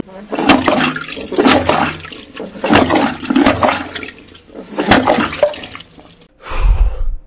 Canos entupidos
clogged, pipes